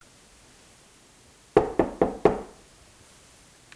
Someone knocking four times on a door. Heard from an inside perspective, but can be effected to sound like the knock is coming from the other side of the door.
impact, hit, door, wooden, Knock, impacting, banging, knocking, hitting, wood, bang